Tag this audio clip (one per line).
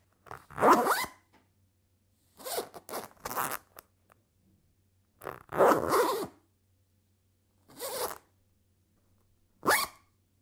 unzip
unzipping
zip
zipper
zipping